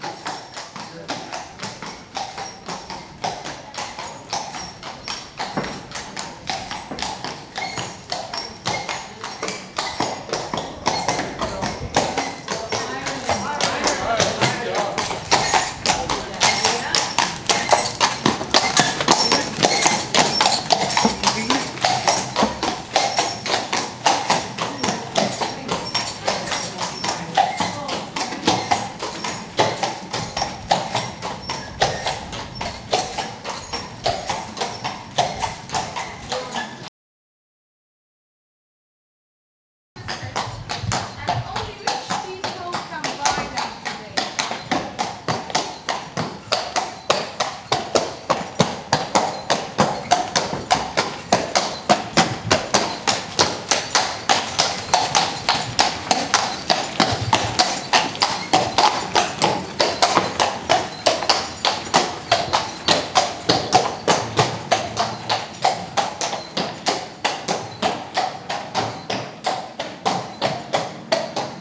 Clatter of hooves with carriage and people in a small paved street.
The horse is going from right to left.
carriage, clatter, horse